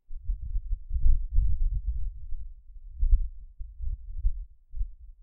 rumble caused by fumble on a zoom
deep, rumble